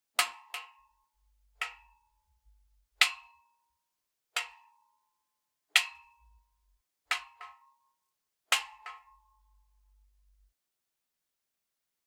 Hitting things with a Rod - 3
SFX - Hitting a Square tubing pipes with a Steel hollow rod. various hits that can be edited for use. recorded outside using a Zoom H6 recorder.
hollow-pipe, iron, Owi, pipe, pipe-hitting-pipe, square-tubing, steel